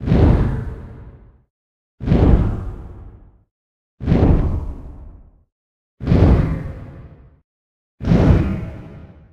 Fire Magic Spell Sound Effect
A mix of two sounds with different pitch flavors.
attack, burning, fireball, flame, magic, magical, scorched, scorching, spell, wizzard